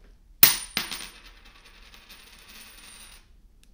coin falling on floor
A single coin falls on a wooden floor.